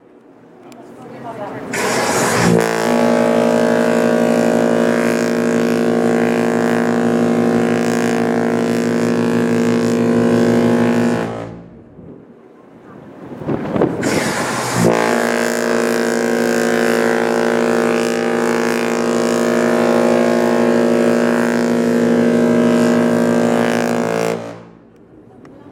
queen-mary-2 NY-2
The shiphorn of Queen Mary 2 recorded on deck while leaving New-York harbour.
Recorded with Canon G10
field-recording, horn, queen-mary-2, queen, new-york, ship